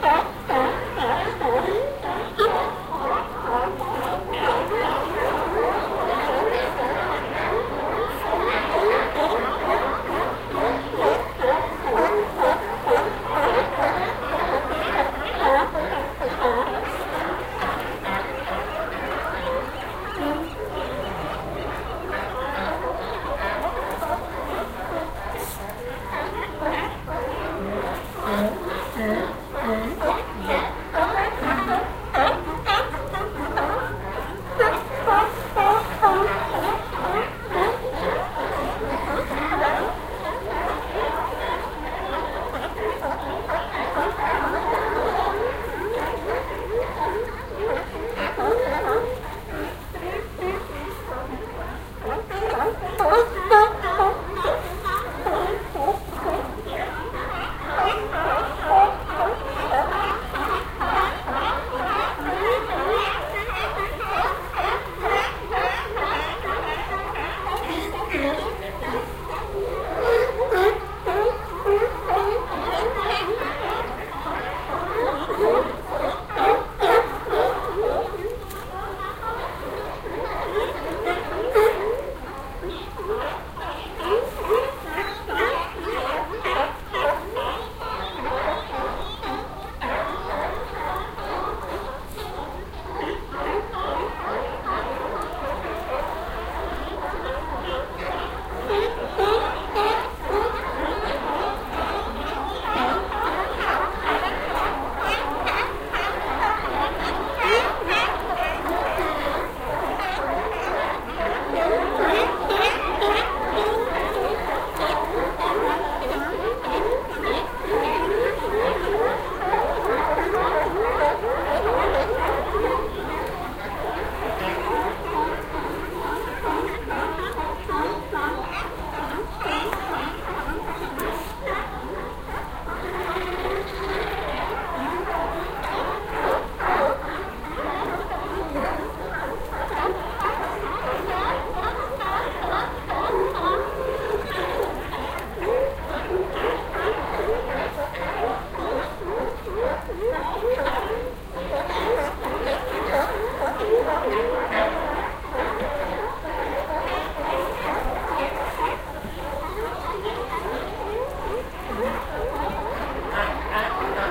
Recording of sea lions at pier 39 in San Francisco. Binaural recording. Soundman OKM II Studio microphones into a Sony minidisc recorder.
Sea, lions, 39, pier, Field-Recording, San, binaural, Francisco